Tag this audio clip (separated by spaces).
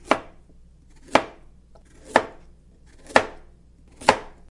knife vegetables cutting food slicing cooking kitchen onion cut diner